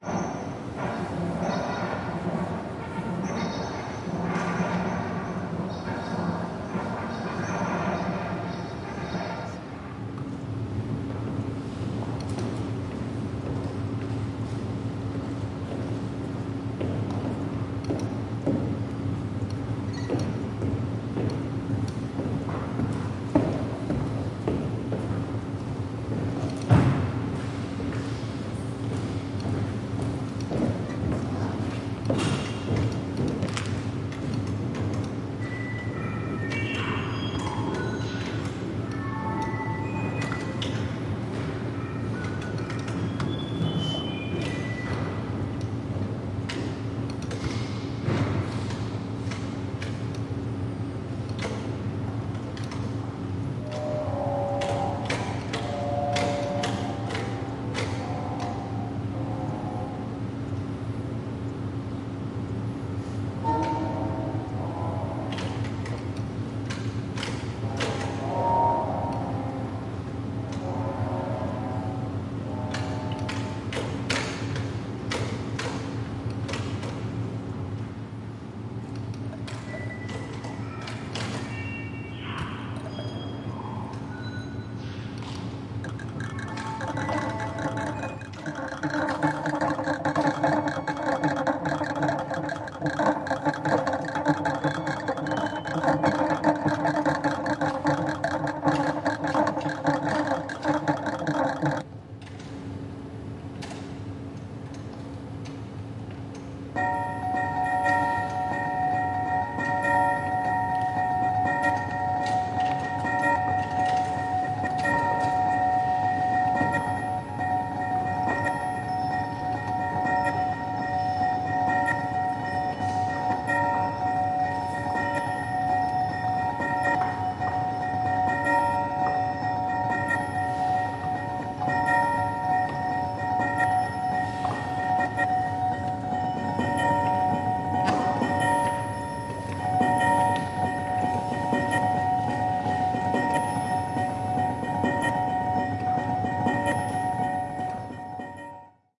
Various ambient sounds recorded in a Shinjuku art gallery in May 2008 using a Zoom H4. Unprocessed apart from a low frequency cut. Metallic sounds, a hum, footsteps on a wooden floor, electronic noise, wooden clonking, glitchy bells.